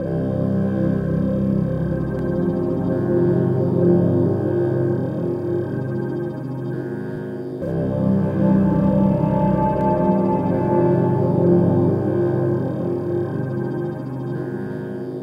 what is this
063 Weeping Loop
Happiness seems to be the opposite, but it's nice to have one of these in the background sometimes.
63bpm, ambient, loop, melancholic, moody, mournful, sad, slow, tragical, wistful